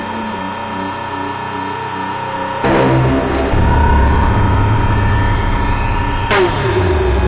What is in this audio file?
the main reactor
dark, electronic, loop, atmosphere, baikal